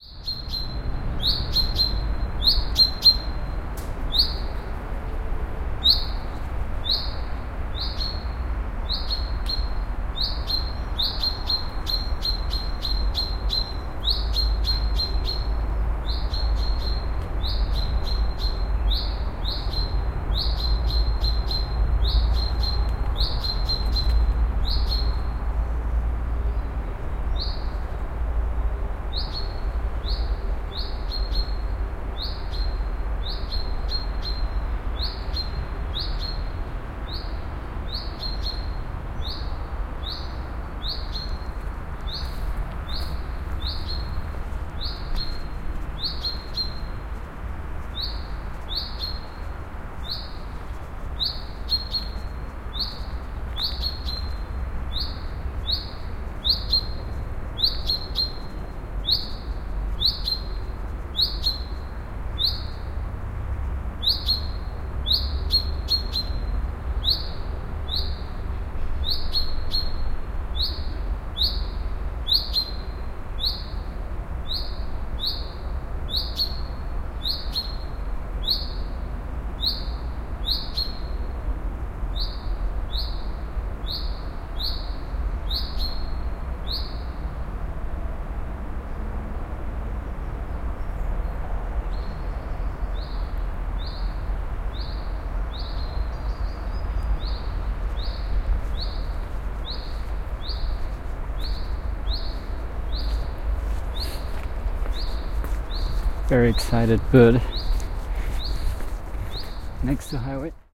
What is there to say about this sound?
Crazy Loud bird, next to the Highway Peripherique in Paris France
n.b. this is a BINAURAL recording with my OKM soundman microphones placed inside my ears, so for headphone use only (for best results)